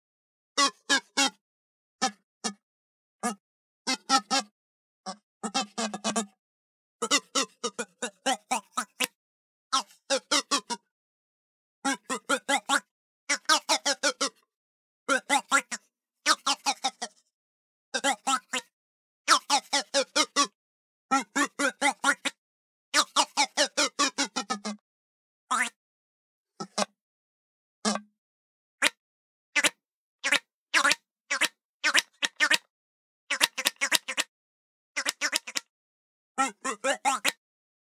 Groan Toy - Short
I recorded my favorite odd groan toy. These are the short slides, some parts have the slap of the whistle hitting the end of the tube. Recorded with my Sennheiser 416 on a Tascam DR-680.
416, dr-680, fast, field, groan, recording, sennheiser, short, slap, slide, tascam, toy